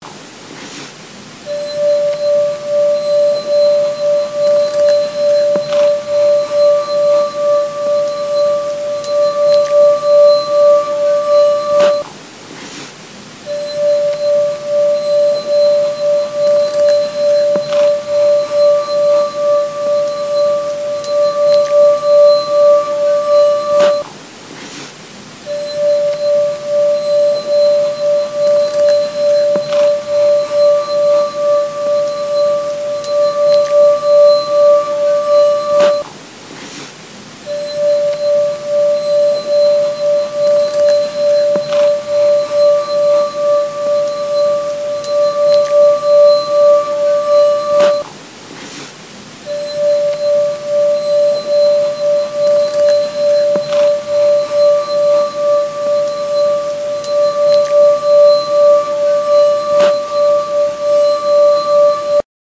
mySound GWAEtoy glass, water, finger
colour, Etoy, mySound, texture